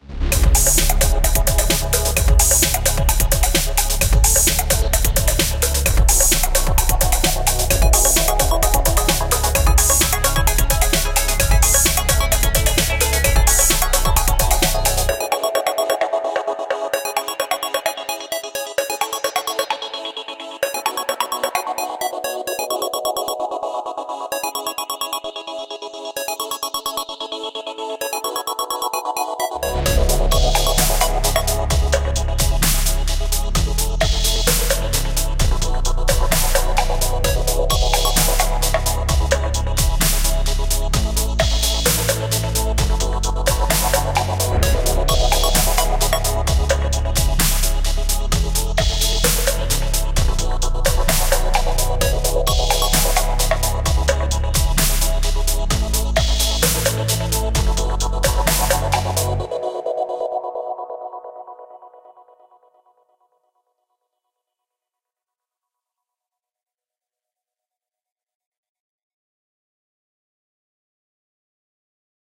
Outro-10-EP
130, 130-bpm, 130bpm, closing, college, creative, end, ending, film, finale, free, movie, music, outro, pattern, production, school, sequence, strings, work, works